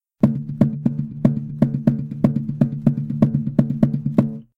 Jungle Guitar Drum 2
Try as a loop! I used my acoustic guitar and did some knuckle-knocking on the body while muting strings. Sounds like a native drum! Effect: speed increase from 33 1/3 rpm to 45 rpm, moise reduction and vol. envelope. Recorded on Conexant Smart Audio with AT2020 mic, processed on Audacity.
acoustic, beat, drum, drumming, foreign, guitar, hit, jungle, knocking, knuckle, loop, pound, rhythm, tap, tapping, tribal, war-drum